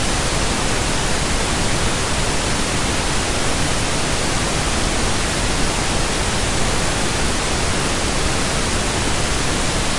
TV Static SFX
TV Static noise